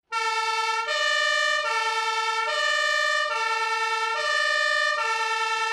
fire service hooter
A hooter form am fire service, recorder in berlin, germany
berlin, fire, from, germany, recorded, service